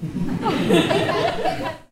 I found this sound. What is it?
small group laugh 3
A group of about twenty people laughing during a presentation.Recorded from behind the audience using the Zoom H4 on-board microphones.
dry, funny, laugh, group, audience, field-recording, male, female